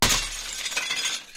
Glass Smash 4
A sound very close to those ones you'd hear in films, recorded from smashing glass inside a glass bin (to reduce volume of the glass in the bin) with an axe. Very close to a vase smash (though the actual article was glass litre bottles.